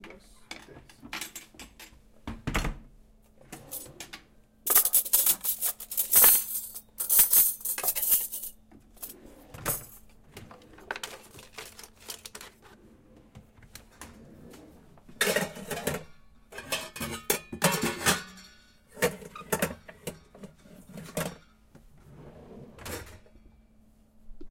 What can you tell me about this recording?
The sound of someone movin cutlery ZOOM H5